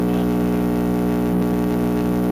Electric Sound Effect
noise, electric, noisy